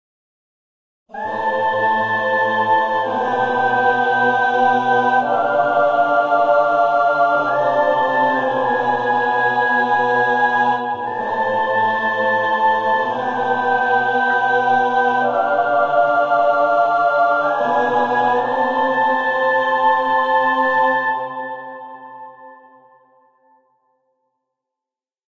Mixed Choir 3 voices
I Played a simple cadence phrase with choir sound. First Women, then added men tenor and men base, then together all in one pack. 3 voicings. Done in Music studio.
Mixed-choir, cathedral, choir, men-women-choir